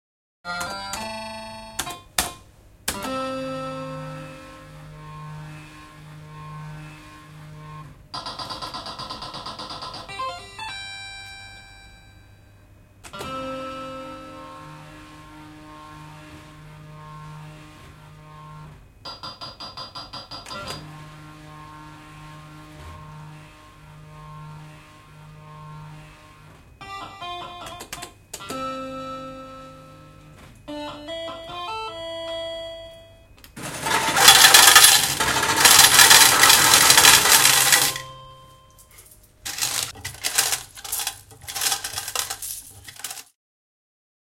Peliautomaatti, "Potti" / Old arcade game, "Kitty", mechanical playing sounds, some elctronic signals, big win, coins tinkle
Pelaamista, automaatin mekaanisia peliääniä ja sähköisiä signaaleja, lopussa iso voitto, rahan kilinää, kolikot kerätään.
Paikka/Place: Suomi / Finland / Kitee, Kesälahti
Aika/Date: 21.08.1993
Kolikot, Coin, Peli, Device, Laitteet, Suomi, Money, Arcade-game, Yle, Automaatti, Finland, Gambling, Gamble, Slot-Machine, Finnish-Broadcasting-Company, Laite, Soundfx, Raha, Uhkapeli, Game, Machine, Pelaaminen